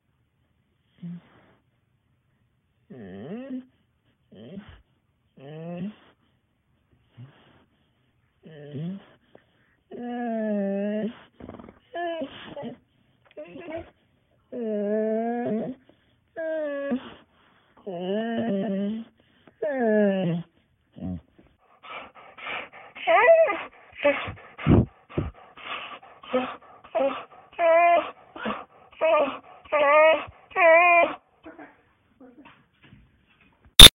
Dog Whining
This is my dog Martino whining for a milkbone. You can also use it for monster sounds or other creatures.